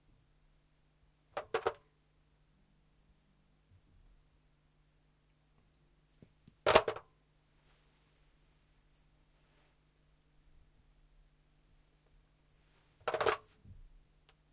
Telephone - Pick up hang up 02 L Close R Distant
Picking up and hanging up a lightweight modern home or office telephone. First take is picking up, next take is hanging up, and so on. Recorded in studio. Unprocessed.